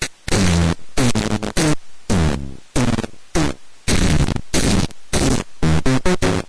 I BREAK IT YOU BUY IT !!! It's a new motto.....
Hehehehe This is a Bent DR 550 MK II YEp it is....